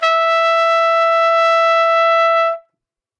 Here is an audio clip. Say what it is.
Part of the Good-sounds dataset of monophonic instrumental sounds.
sample, trumpet